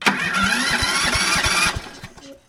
The mower false[cold] starts (meaning it does not start).